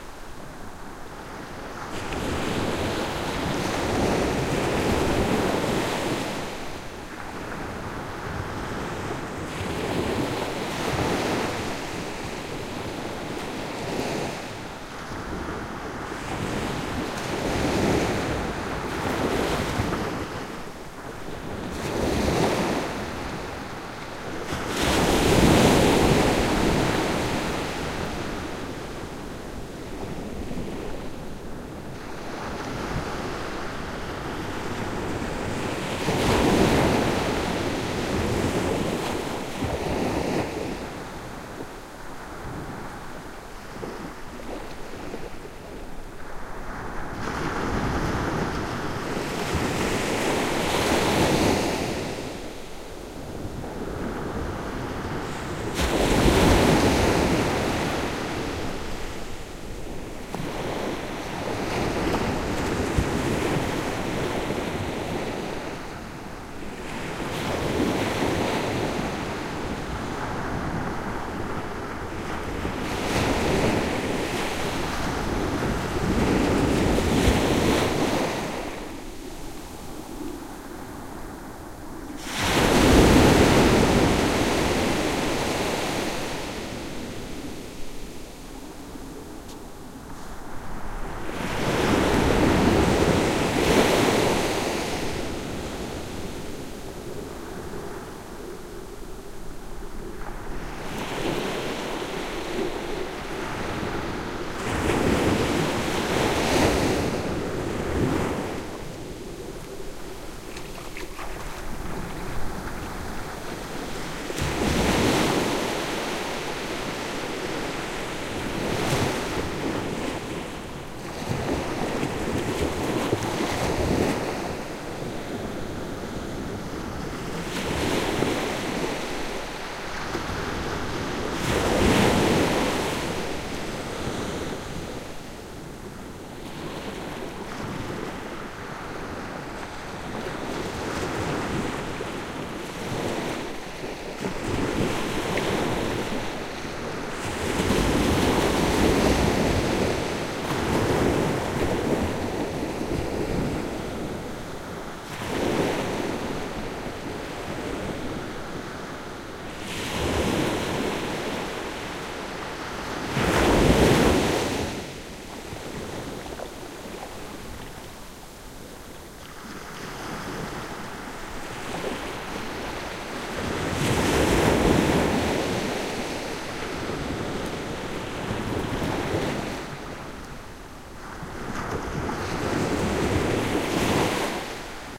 Waves breaking on a sandy beach, at medium distance. Recorded on Barra del Rompido Beach (Huelva province, S Spain) using Primo EM172 capsules inside widscreens, FEL Microphone Amplifier BMA2, PCM-M10 recorder.
beach, field-recording, Spain, splash, surf, water, waves